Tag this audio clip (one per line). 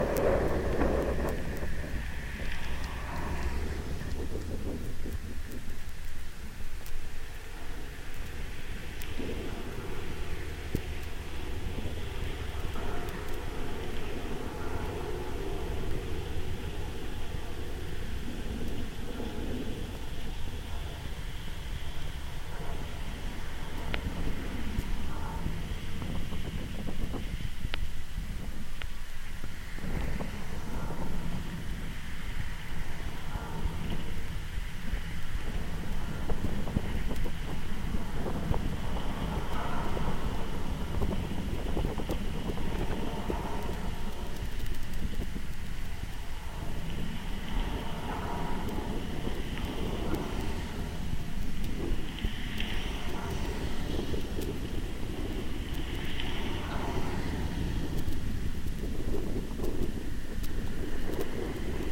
bridge
cable
contact
contact-microphone
field-recording
Fishman
Golden-Gate-Bridge
piezo
sample
sony-pcm-d50
V100
wikiGong